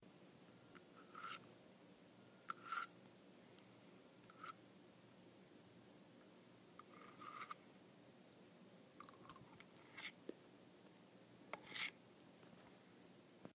fork scraping teeth
I scraped a fork against my teeth a few different times. There was no food on the fork!
dining, scrape, table-manners, fork, teeth, metal